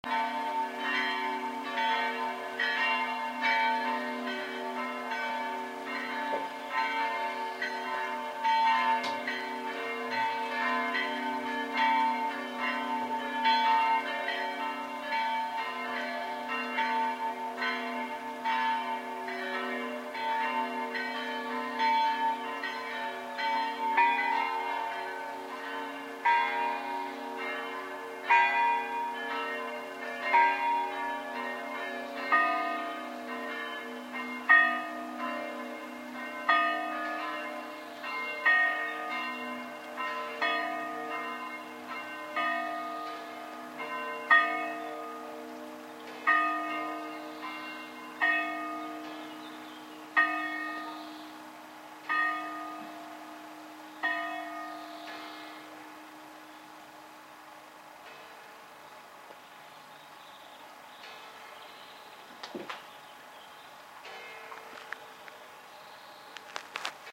I recorded this sound on my sony handycam outside a nearby church in salzwedel, Germany.this is my first upload on this site.